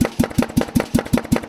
Power hammer - Billeter Klunz 50kg - Quantized exhaust vent 8 hit
Billeter Klunz 50kg exhaust vent quantized to 80bpm (orig. 122bpm) with 8 hits.
motor, metalwork, power-hammer, pressure, forging, 80bpm, labor, blacksmith, tools, quantized, work, 1beat, exhaust-vent, crafts, machine, air, billeter-klunz